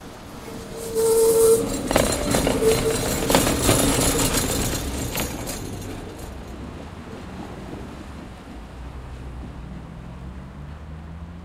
tram at veering 1-creak
Streetcar at veering with loud characteristic creak.
Recorded: 2012-10-13.
cars, city, creak, crossroad, noise, rumble, streetcar, tram